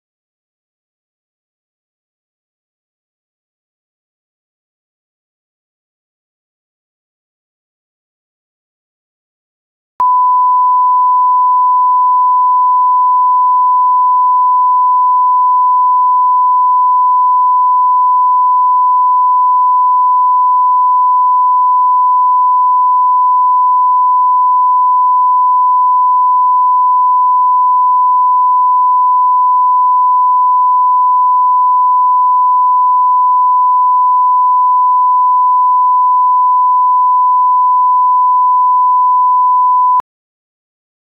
TV Test Tone (1kHz -6dBFS)
A test tone that can be used for final mix for tv and other similar media. It contains 10 seconds of silence and then 30 seconds of a 1kHz test tone (sine wave) with the digital level set at -6dBFS.
1kHz, tone, test, 1-kHz, tv, 1000Hz, sine, wave